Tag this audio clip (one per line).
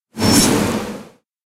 Dagger
Magic
Spell
Swing
Swish
Sword
Wind
Wizard